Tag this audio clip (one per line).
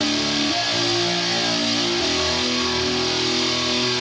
blazin
crushed